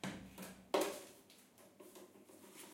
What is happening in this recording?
loop de percusion echo con la tapa del jabon
casual drum loop inconsciently made with shampoo tube
h4n X/Y
soap, drum